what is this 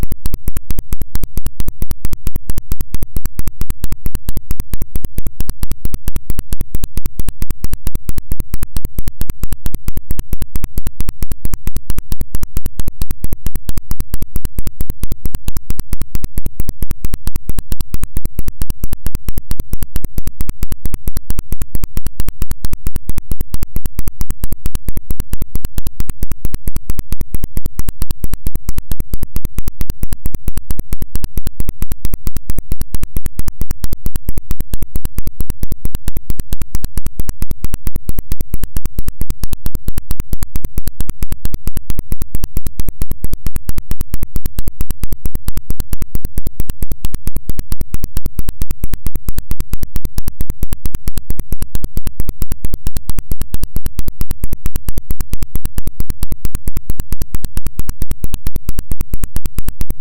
Click Dance 53
So when I launched Audacity within Gentoo Linux, a strange ground loop occurred. However, adjusting the volume settings within alsamixer caused the ground loop to become different per volume settings. The higher the volume, the less noise is produced; the lower the volume, the more noise is produced.
Have fun, y'all!
abstract, click, dance, digital, effect, electric, electronic, freaky, future, fx, glitch, ground-loop, lo-fi, loop, noise, sci-fi, sfx, sound, soundeffect, strange, weird